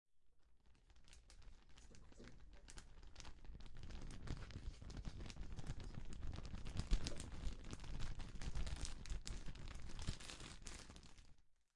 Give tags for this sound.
its raining rain

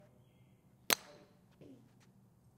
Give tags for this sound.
striking-a-small-object small-ball golf-ball-hit small-hollow hollow hitting-a-golf-ball golf clup golfing golf-club small-object-hit

